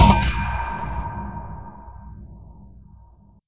Metallic Snare
A metallic percussive sound of my house. I added reverb and some effects with audacity.
drum, metallic, processed, snare